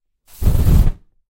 Homemade flame gun